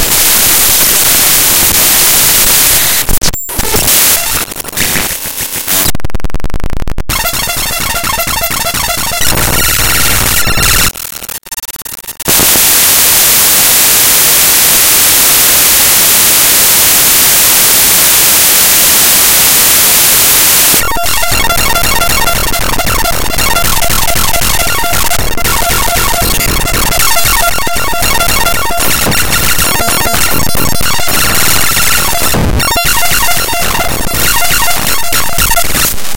created by importing raw data into sony sound forge and then re-exporting as an audio file.
harsh, raw, glitches, clicks, data